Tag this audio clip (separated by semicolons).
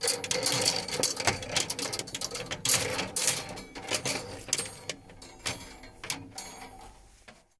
clothes; clothing; hanger; jangle; metal